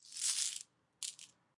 Coins from one hand to another
coins, hand